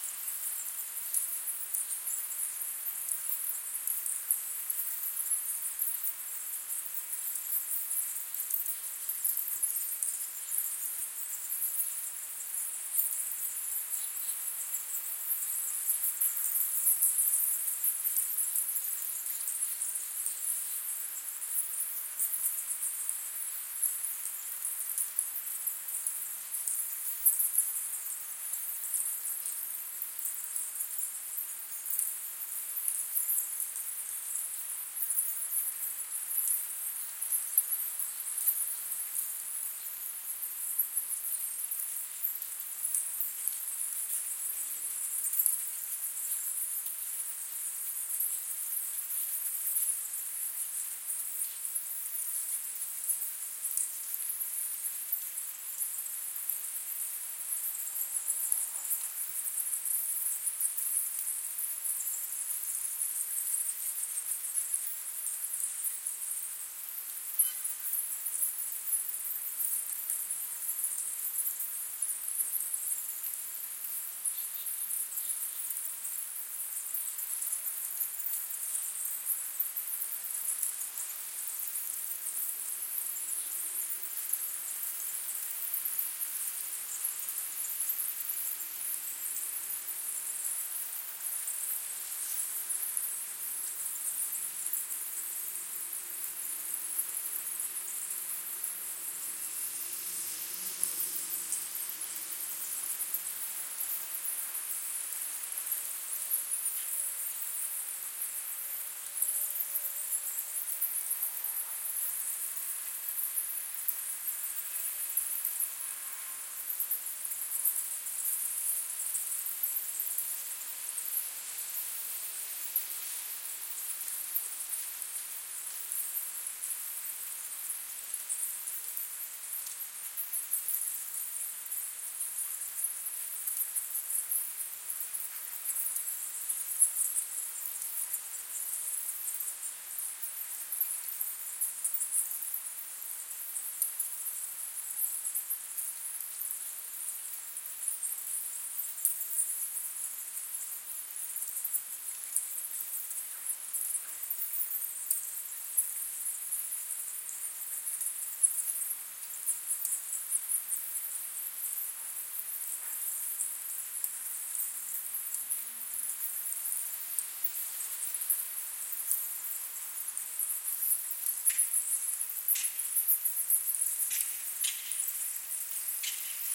This is a different version of 'Bats ambience 1' that has been treated with a hipass filter to reduce everything below 5k or so because 6k is about the lowest pitch produced by the bats.
From a recording made underneath the 'Congress Bridge' in Austin Texas which is home to a large bat colony.